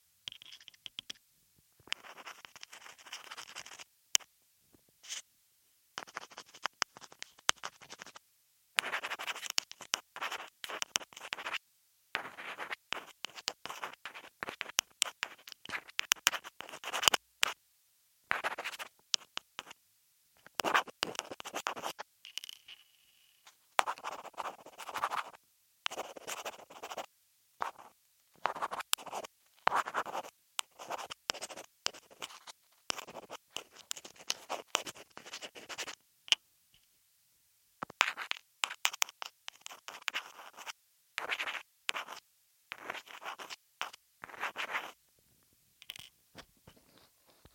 Rubbing a nail directly on a contact mic. This could be suitable layered as a foley sound to serve in place for writing with a pencil, pen, or chalk. If necessary, EQ out some top end if it's too metallic sounding or adjust for speed and pitch.
scratchy, paper, pen, piezo, writing, contact-mic